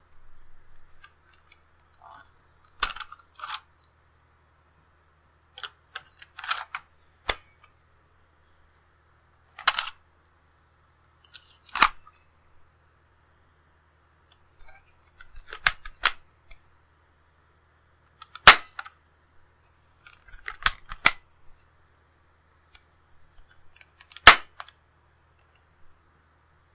Reloading and cocking a BB gun for something I'm making. Figured why not give it to everyone.
Not needed at all and I won't be bothered if you won't.
Use it all you want.